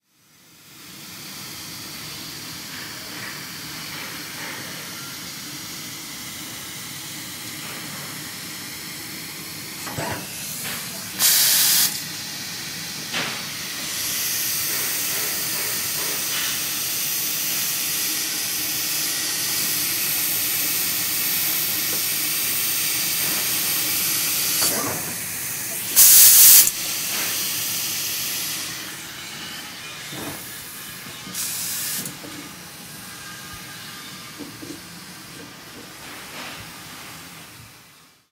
The subtle sounds of a lazer cutter
factory, field-recording, industrial, industry, lazer-cutter, machinery